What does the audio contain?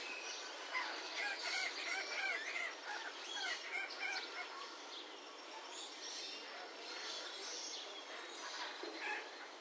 saz racket tailed roller
Calls from a Racket-tailed Roller, with grackles and lorikeets in the background.